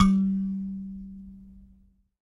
SanzAnais 54 G2 bz +chaud
a sanza (or kalimba) multisampled with tiny metallic pieces that produce buzzs